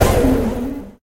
STAB 016 mastered 16 bit from pack 02
An electronic effect composed of different frequencies. Difficult to
describe, but perfectly suitable for a drum kit created on Mars, or
Pluto. Created with Metaphysical Function from Native
Instruments. Further edited using Cubase SX and mastered using Wavelab.
effect, spacey, industrial, electronic